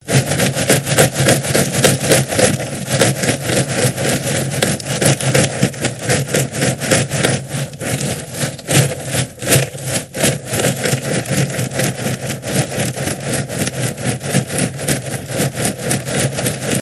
Box of Cheez-its